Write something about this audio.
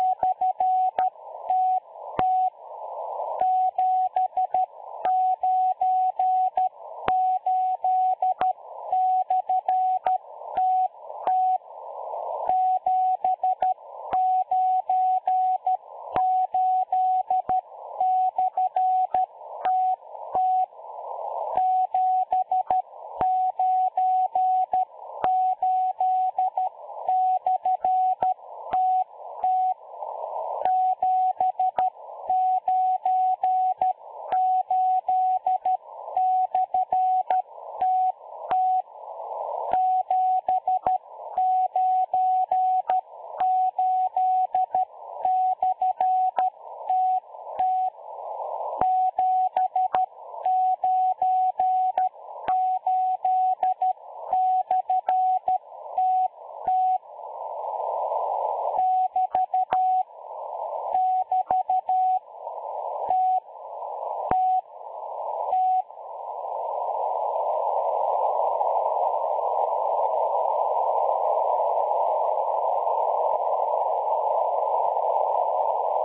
M03 numbers station broadcasting in Morse code. Picked up and recorded with Twente university's online radio receiver.
beep, code, M03, morse, morse-code, numbers-station, radio, shortwave